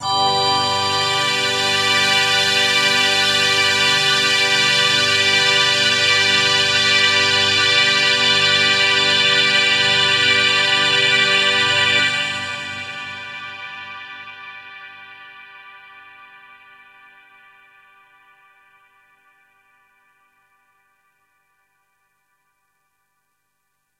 Space Orchestra [Instrument]